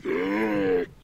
Monster Bellow 8
bellow, creature, fantasy, monster, monster-bellow